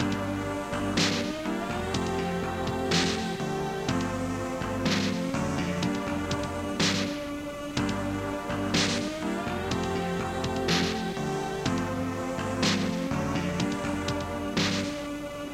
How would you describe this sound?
Cass 011 A CisBack Loop02
While looking through my old tapes I found some music I made on my Amiga computer around 1998/99.
This tape is now 14 or 15 years old. Some of the music on it was made even earlier. All the music in this cassette was made by me using Amiga's Med or OctaMed programs.
Recording system: not sure. Most likely Grundig CC 430-2
Medium: Sony UX chorme cassette 90 min
Playing back system: LG LX-U561
digital recording: direct input from the stereo headphone port into a Zoom H1 recorder.
bass, Amiga, Loop, Sony, Amiga500, tape, collab-2, synth, chrome